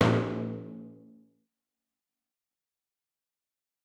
A clean HQ Timpani with nothing special. Not tuned. Have fun!!
No. 4
pauke, HQ, acoustic, percs, dry, stereo, timp, hit, one-shot, percussive, percussion, orchestra, timpani